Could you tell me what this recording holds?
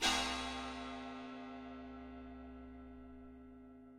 China cymbal scraped.
china-cymbal, scrape, sample, scraped